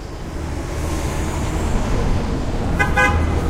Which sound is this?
City Passing Truck with Car Horn
noises, nyc, passing, city, field-recording, car, new-york, trick